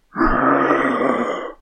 female growl2
Slightly annoyed zombie-like growl by a female. Recorded and performed by myself.